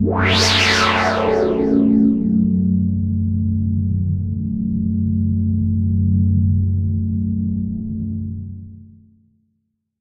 something of a electro chord hit